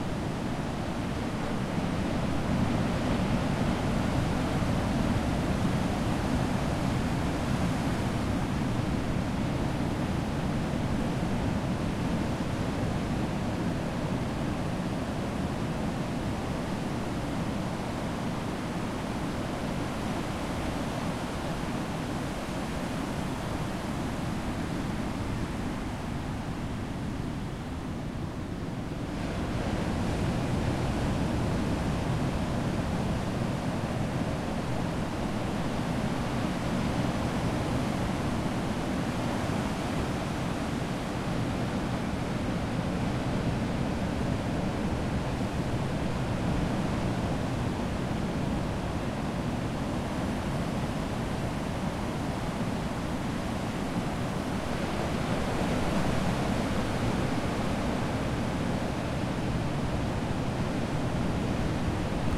11ft waves breaking on sandy beach in the distance from the top of the cliff
11ft waves breaking out at sea beyond the beach at Bournemouth, with no wind noise and a clean recording. From a Tascam DR-22WL handheld recorder with a Rycote softie, taken from the top of the cliffs on the path, with my back against a stone wall. Recorded at night, so no sounds from people or vehicles.
sandy-beach, shore, surf, atmos, breaking, field-recording, seaside, wildtrack, coast, water, sea, clean-recording, waves, tascam, clean, beach, booming, ocean, nature-sounds, ambience, distant, white-noise, ambient, storm, soft, big, sand, stereo, surfer